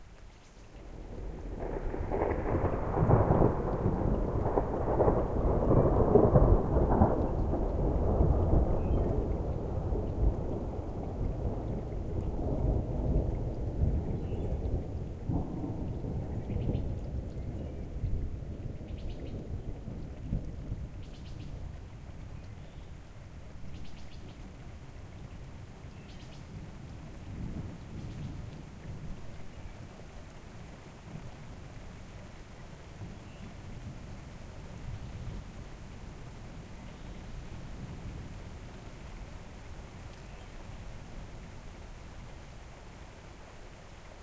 Equipment: Tascam DR-03 on-board mics w/ fur windscreen
Some 'rolling' thunder, recorded before the rain came. I like to refer to these as 'dry fires'